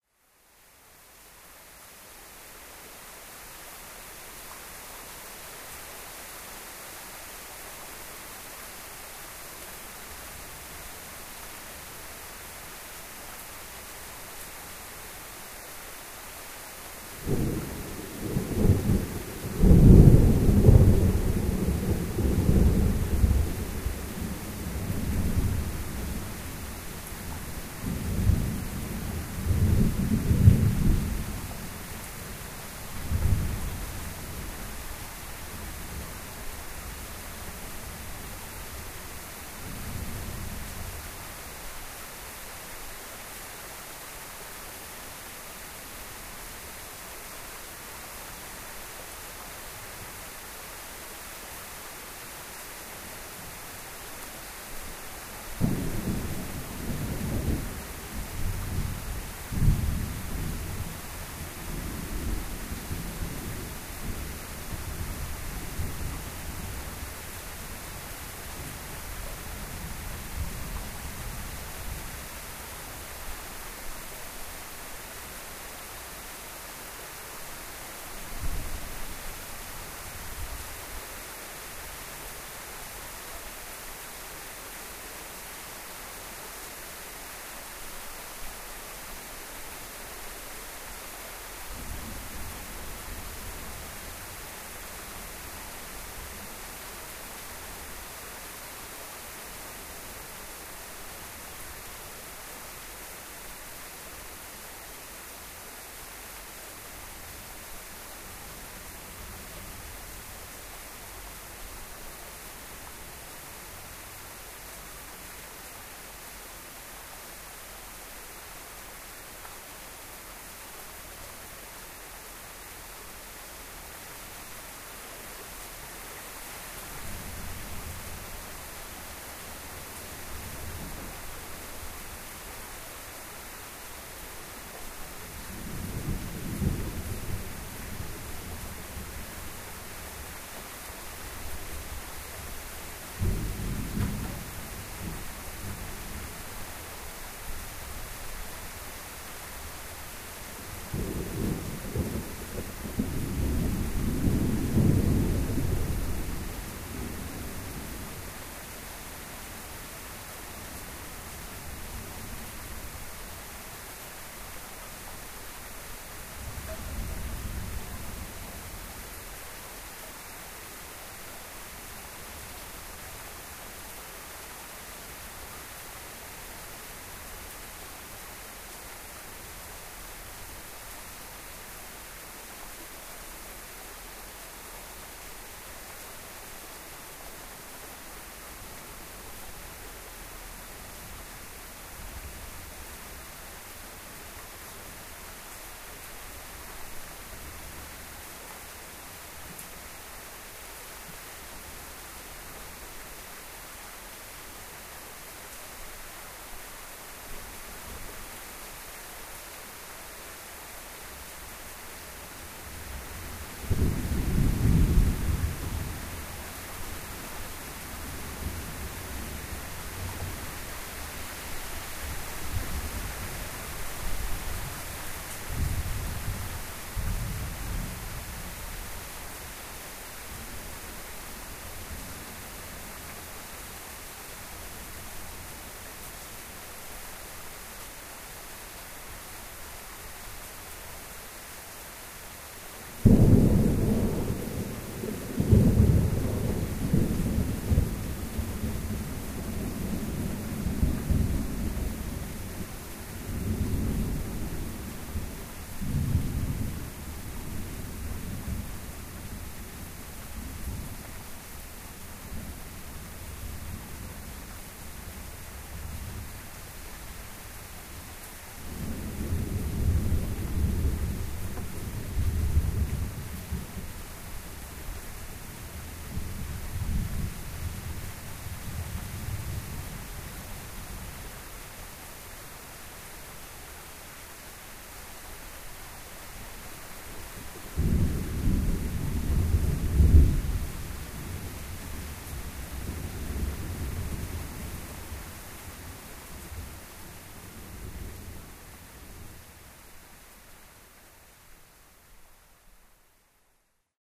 Storm on Ko Samet.